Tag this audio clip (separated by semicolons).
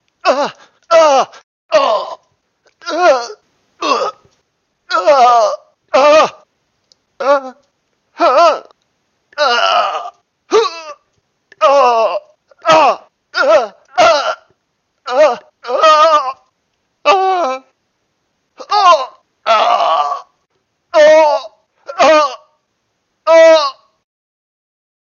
hurt; wounded; yell; man; male; warrior